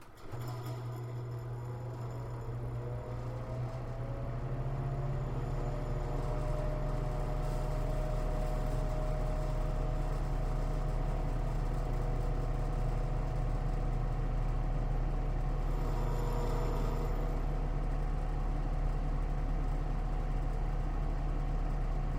Heater warmup
A recorded sound of my old QUARTZ 1500W heater starting up on the 750W (halfway) setting
power-up, Warm-up, startup, buzzing, start-up, powerup, Warmup, Heater